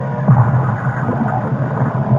Another weird noise